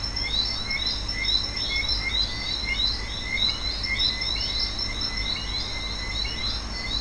When I came to the strange decision to try recording my poems as songs I looked for ambience around the house. Summer night sounds on sleep-aid/relaxation device